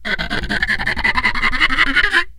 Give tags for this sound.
wood; daxophone; idiophone; instrument; friction

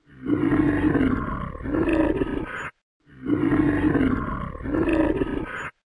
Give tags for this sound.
2; roar

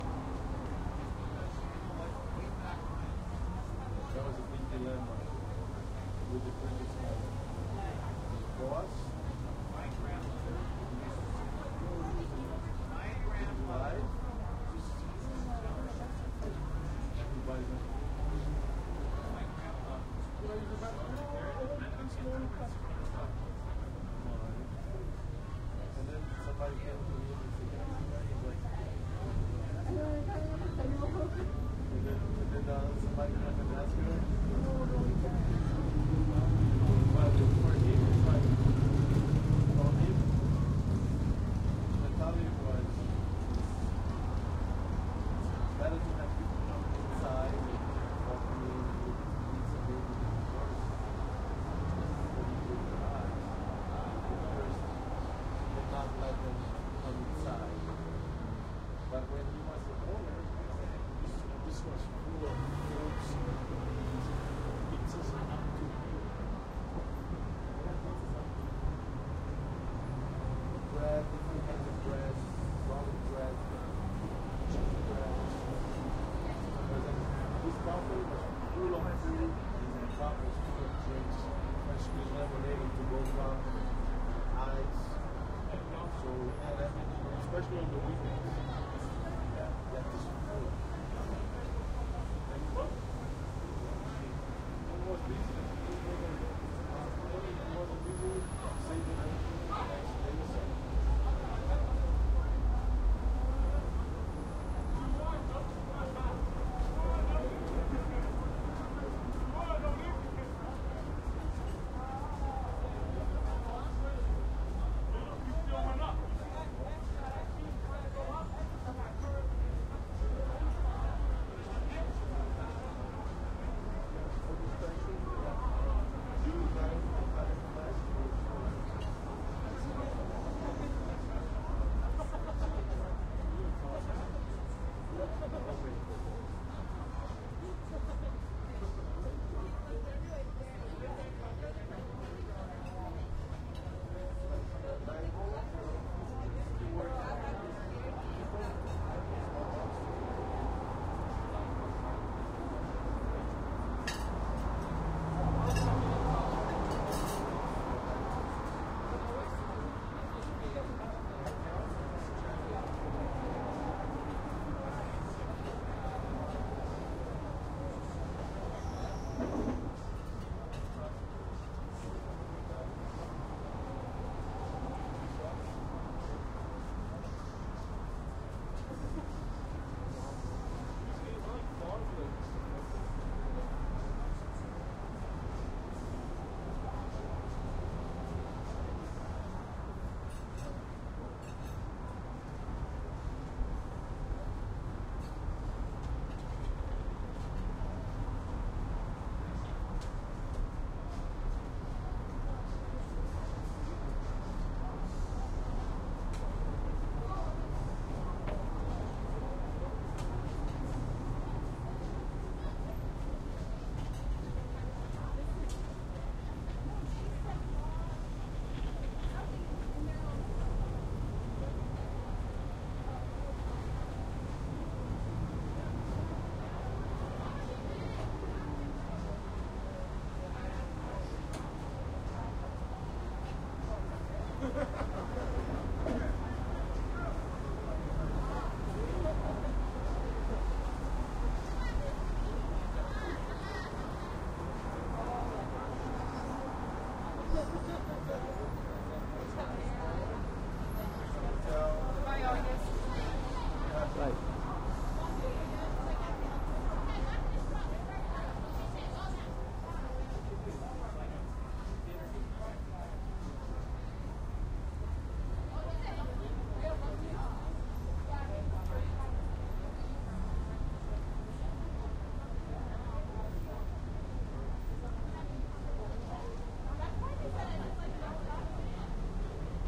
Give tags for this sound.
beach; talking; Caf; american; traffic; food

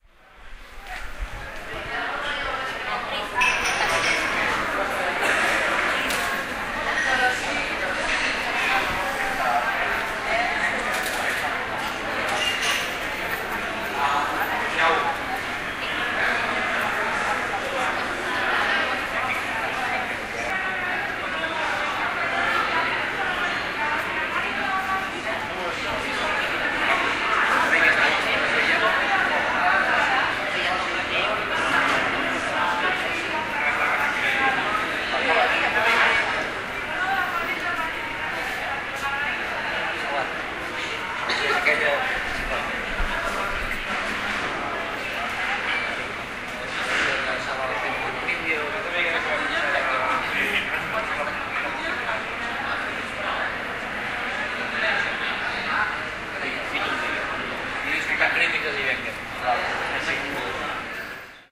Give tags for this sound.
cinema; ambient

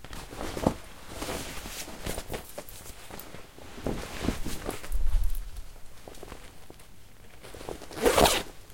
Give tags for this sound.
clothes; jacket; leather

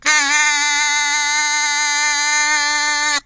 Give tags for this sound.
free; kazoo; multisample; sample; sound

